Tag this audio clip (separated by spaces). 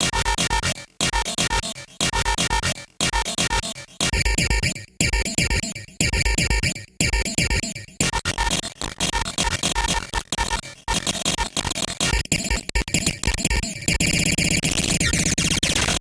glitch loop sequence rhythmic